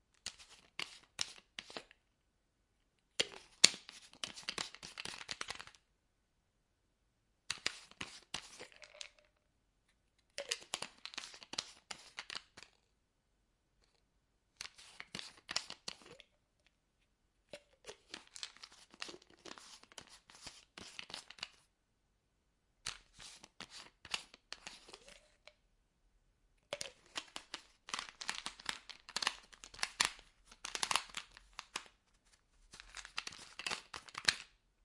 open close small box with caffeine pills
box, caffeine, close, open, pills, small